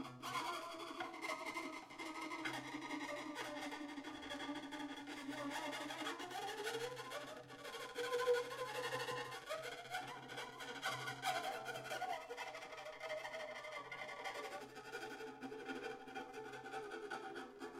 guitar string rubbed with coin
guitar strings rubbed rapidly with a metal coin to make a sort of eery shaking sound
coin, eery, guitar, rub, shaking, string